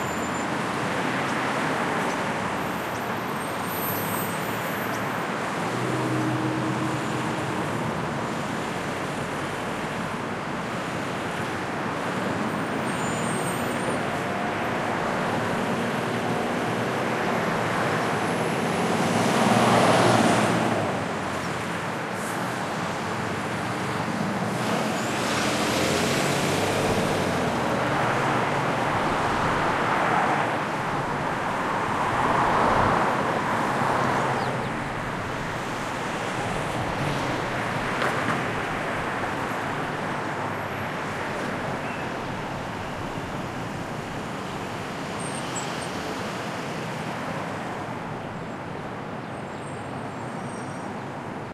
Afternoon traffic on North Carolina Ave. in Washington DC. The recorder is situated on the median strip of the street, surrounded by the rush-hour traffic, facing the Capitol in the southwest.
Lots of cars, buses and trucks passing, heavy traffic noises.
Recorded in March 2012 with a Zoom H2, mics set to 90° dispersion.
afternoon, athmo, atmo, busy, city, close-range, field-recording, rush-hour, spring, traffic, urban, USA, Washington-DC